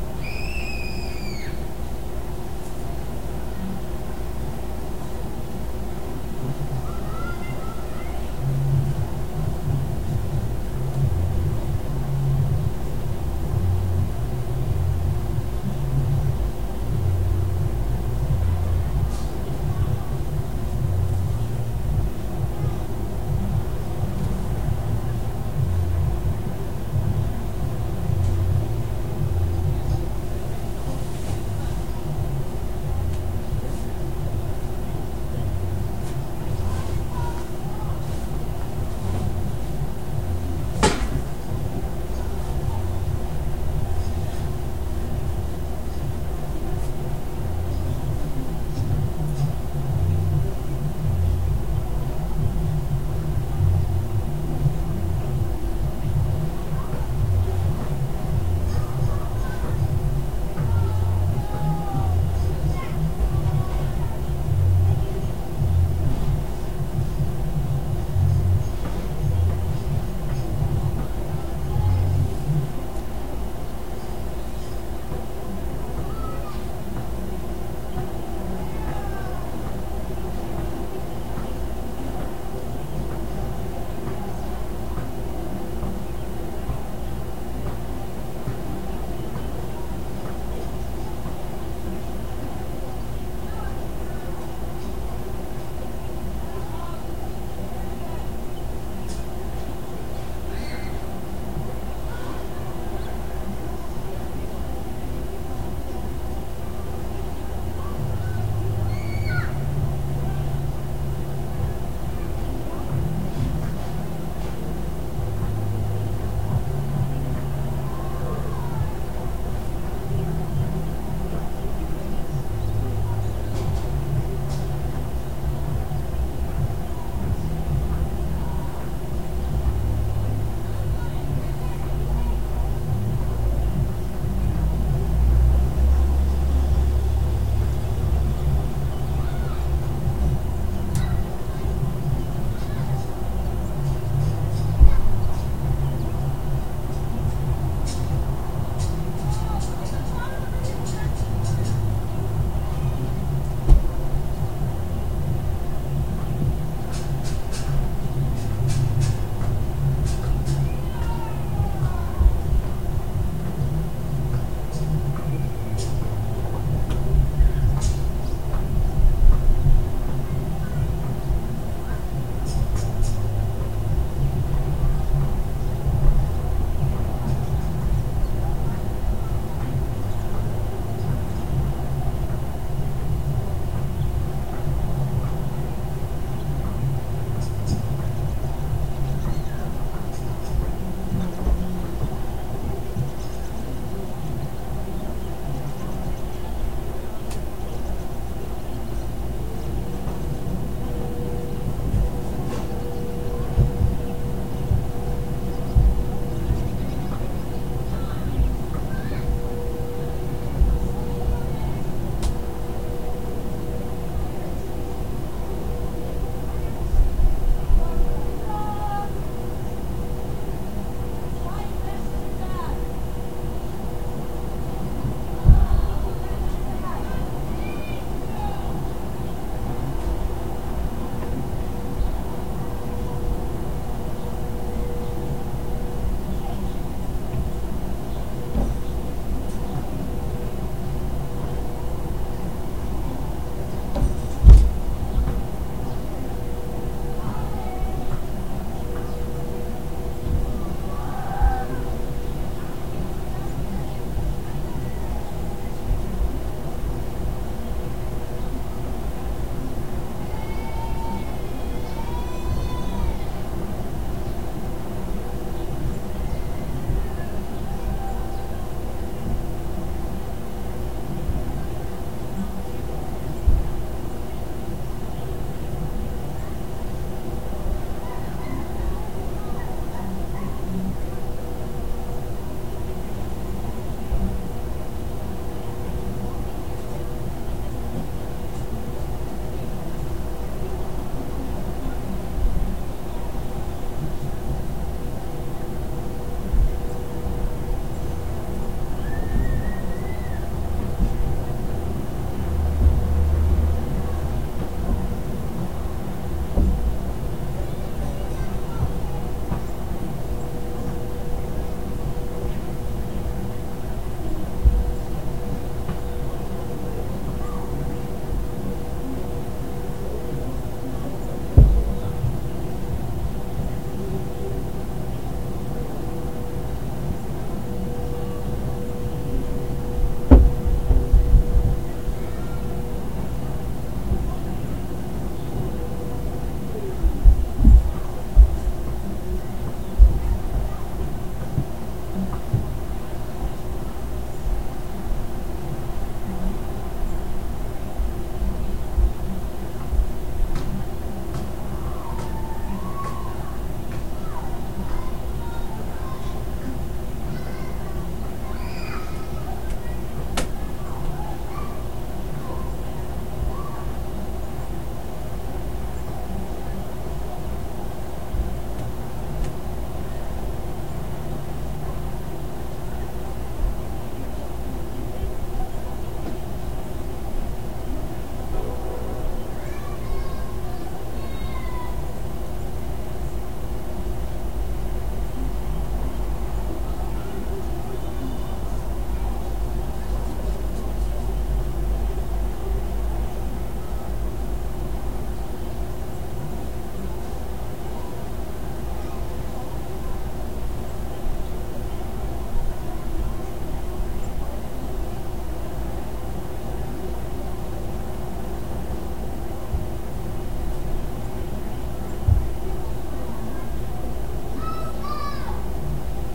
kids outside & noisy neighbors Afternoon 04-15-2016
Recorded with Lifecam HD3000 You can hear kids playing outside my window, the neighbors' stereo in the apartment next door, their air conditioner, their vacuum cleaner after they shut down the stereo, they're tromping around and hitting the walls, a few distant birds, and one toot of a train about to come into town. It's forbidden for them to blow the horn inside city limits.
bass, Atchison, afternoon, outside, home-stereo, neighborhood, window, ambience, neighbors, ambient, soundscape, birds, basketball, field-recording, people, thump, general-noise, kids, floor, screaming, playing, Kansas, traffic, town, children, stereo, bang, toys